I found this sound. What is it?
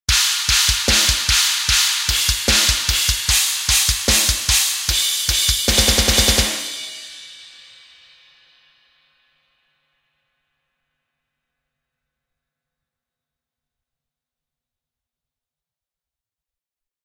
Death metal drums 2
brutal, core, metal, symbal, slam, snare, drums, death